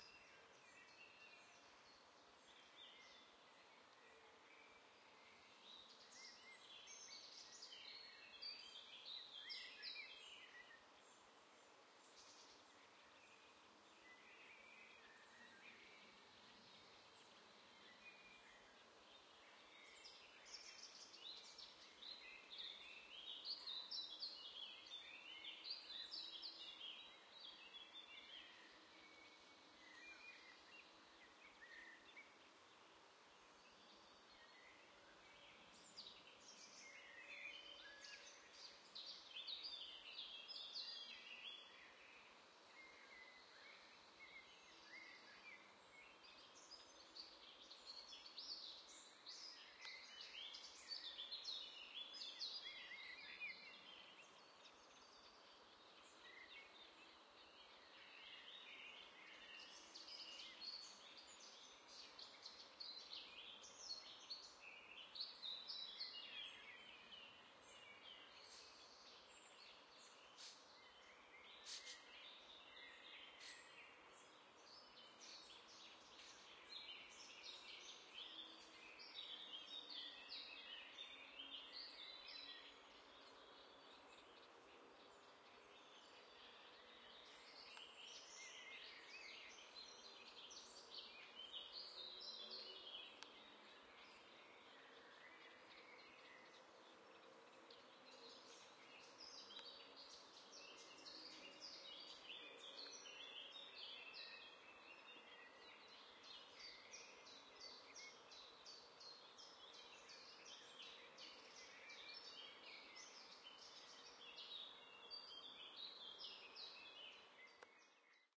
Nature Sounds Berlin-Buch1
I recorded with my Sony recorder some nature sounds in Berlin Buch. There is a highway near the forrest which I filtered out in the deep frequencies.
berlin, birds, buch, forrest, nature